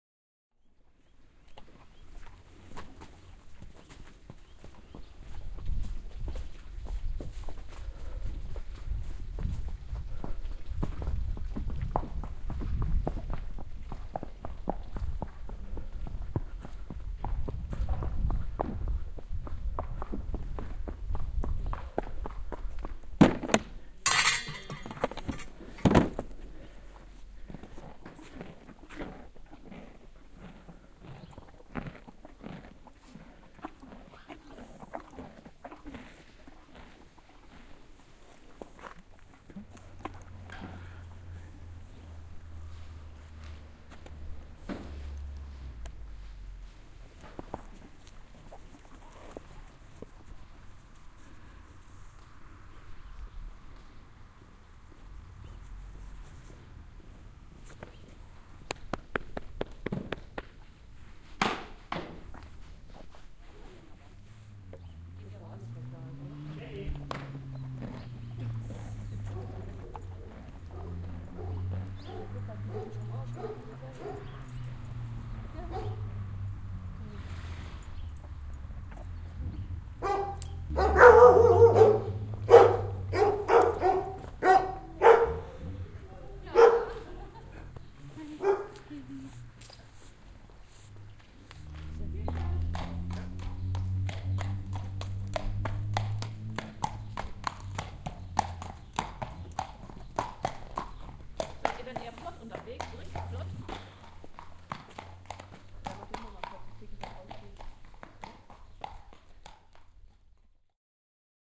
Atmosphere on the "Horse Camp" in Hohenfelden near Erfurt (Horses, dogs and German conversation)
3d-recording, binaural, country, country-life, countryside, field-recording, horse-camp, horses, village